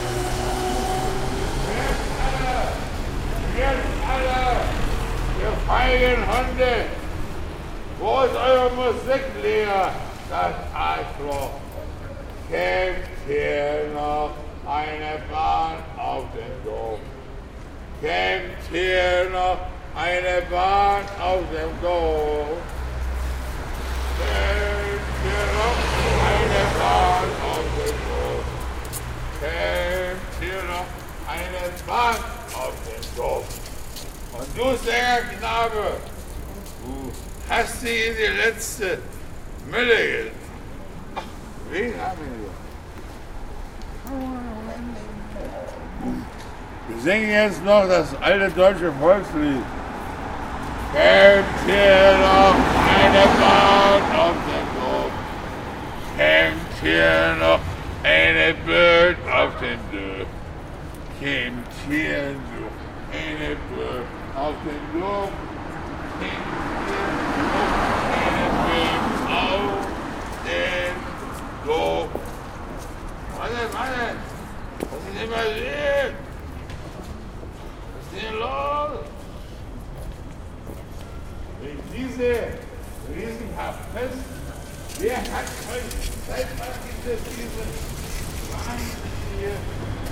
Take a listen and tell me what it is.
Place: Berlin Germany
Time: December 2006, 22 h
Mic: Schoeps CCM 5lg Cardioid
Rec: Sound Devices 722